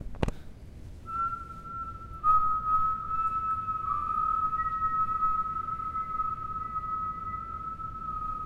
Group of 5 people whistling softly.
Sounds produced and recorded by students of MM. Concepcionistes in the context of ESCOLAB activity at Universitat Pompeu Fabra (Barcelona).
Recorded with a Zoom H4 recorder.